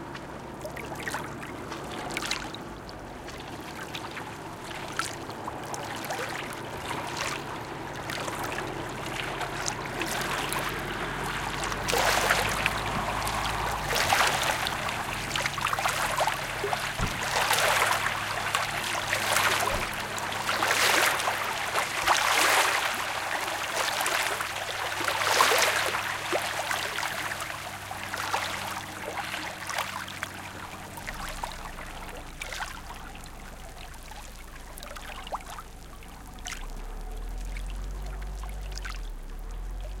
soft waves, traffic noise in background. Shure WL183 into Fel preamp, Olympus LS10 recorder. Bomarsund, Aland Island